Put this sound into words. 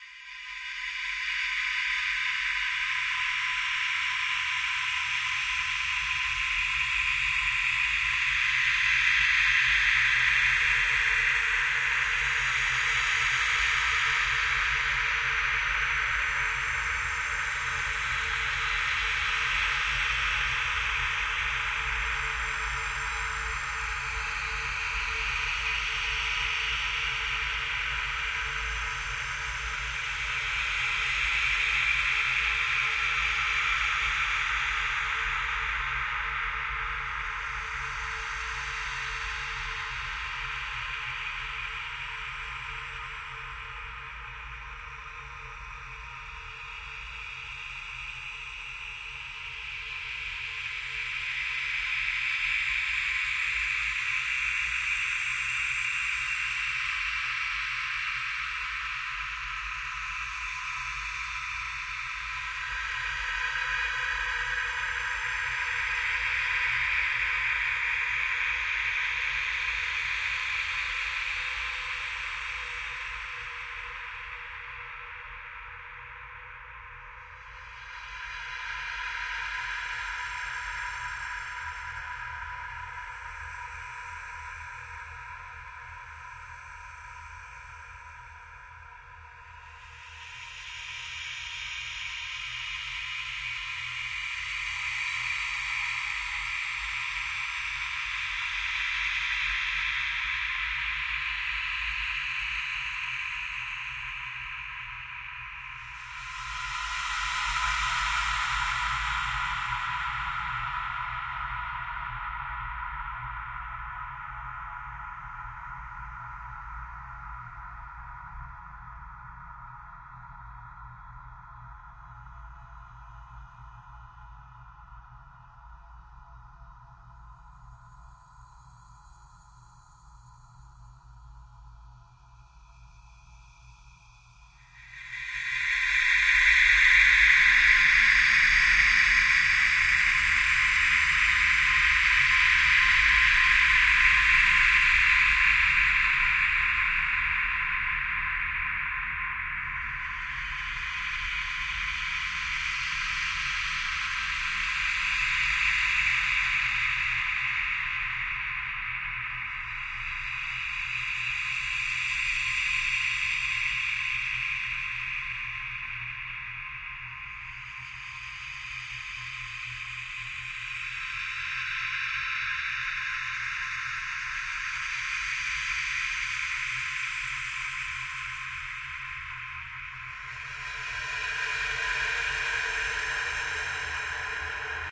Scary Ambience
An ambience made from sounds of me typing which had reverb added and then were paulstretched. The end result sounds like something straight out of SCP Containment Breach.
nightmare, spooky